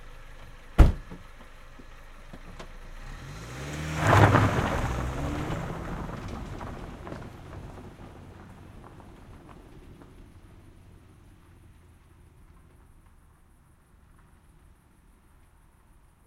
Departure with on field gravel road